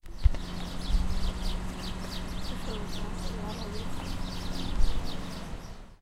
N yA-Pardal o Gorrion
Aquest ocell el vam veure en un arbre en el parc de la solidaritat.
birds, city, deltasona, el-prat, field-recording, house-sparrow, ocells, park, tree